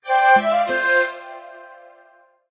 This is a short notification for the end of a player's turn. Created in GarageBand and edited in Audacity.